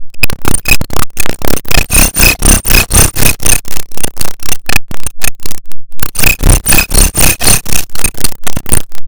There's been a breach in the hackframe. Prepare to launch diagnostic security mi55iles.
abstract, breach, buzz, click, crunchy, diagnostics, digital, distorted, droid, electric, electronic, fold, future, generate, glitch, glitchmachine, hack, interface, machine, noise, robobrain, robotic, sci-fi, sfx, sound-design, sounddesign, sound-effect, soundeffect, strange, telemetry